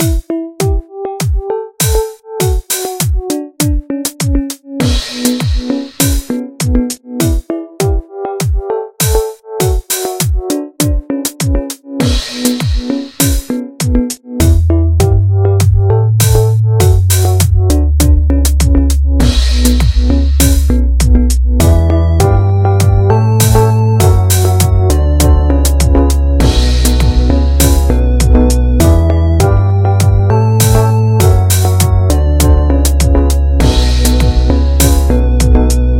Chill Videogame Music
videogame, loop, chill, music, relaxed